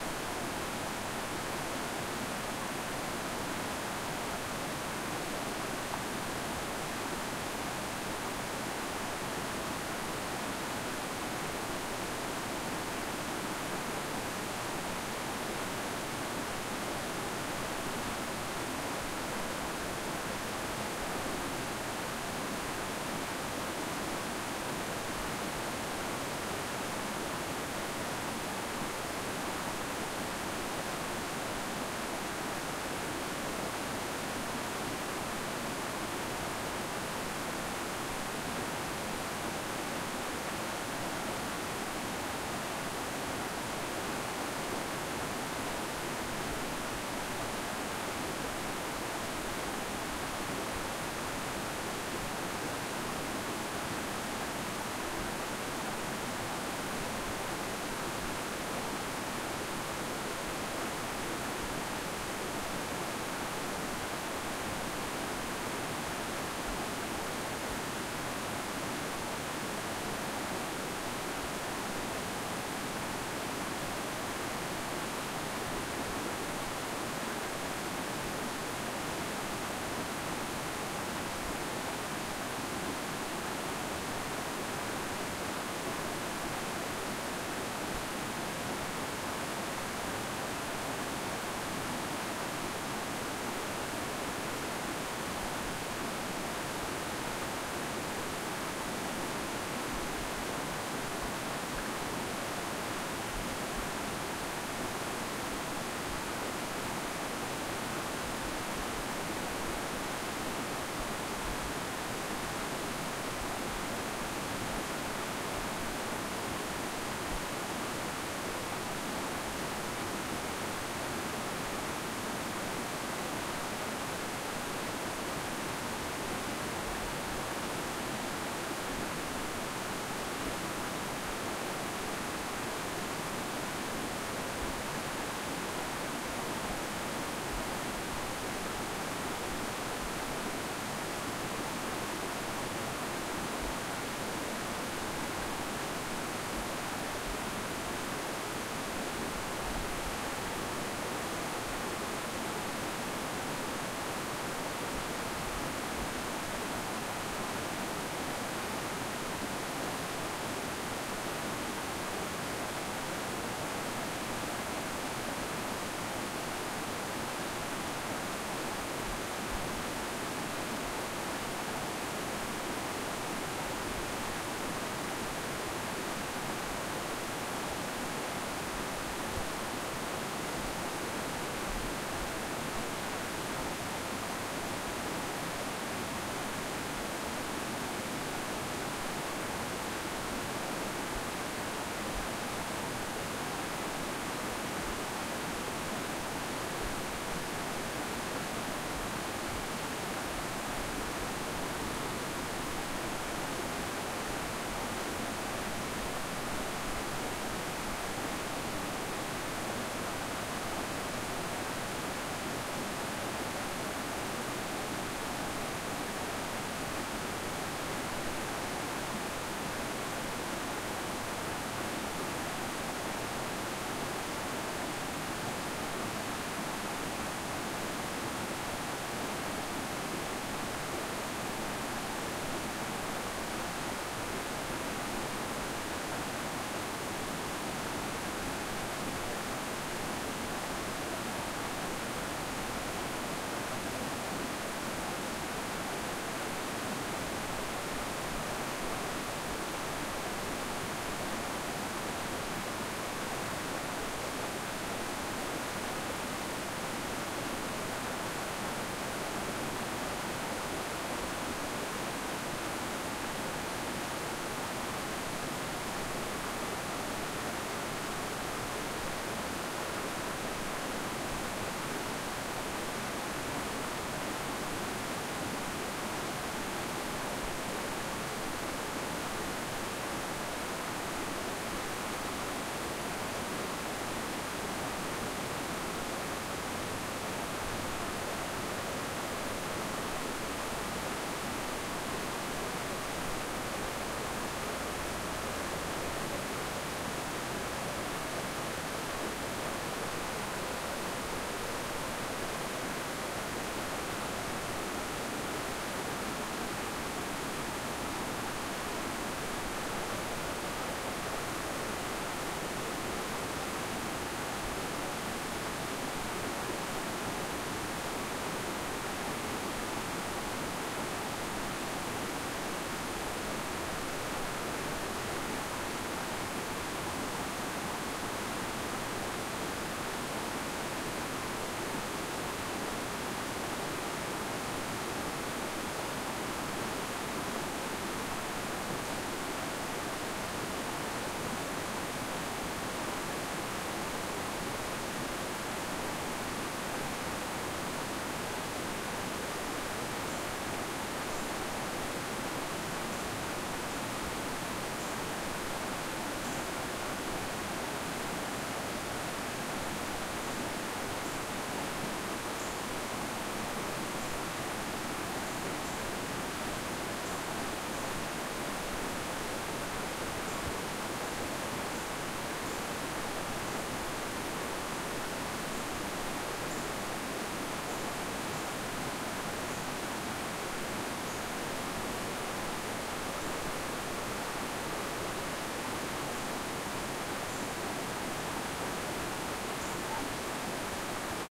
Between Two Rapids
This is a recording between two rapid sections of the creek, with the recorder pointed right in the middle of the water. It's noisier than some of the others in this pack. Zoom H4N.
nature, water, rocks, campsite, outdoors, creek, flow, ambience, calm, light